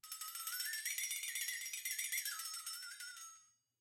One-shot from Versilian Studios Chamber Orchestra 2: Community Edition sampling project.
Instrument family: Percussion - Metals
Instrument: Flexatone
Room type: Band Rehearsal Space
Microphone: 2x SM-57 spaced pair